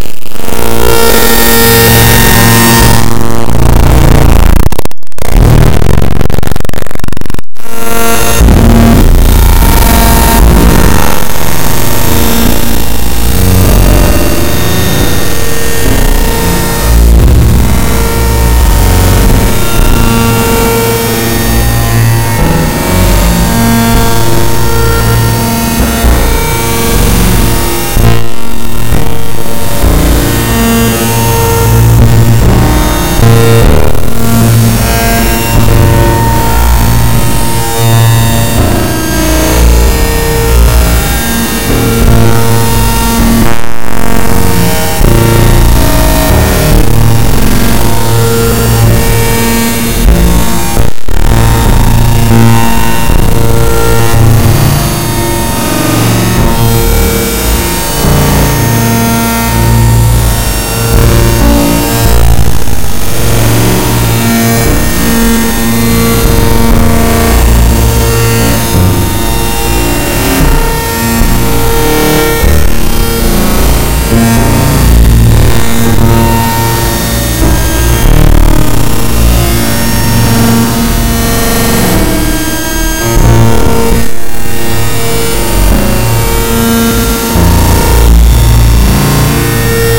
extreme noise fltr1
WATCH OUT FOR YOUR SPEAKERS (and ears).A resulting sample of a very simple patch with just some noise~, saw~, comb~-filters and of course feedbackloops in a study of noise-filtering.